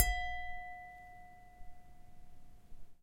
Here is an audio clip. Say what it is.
Single hit on a large wine glass.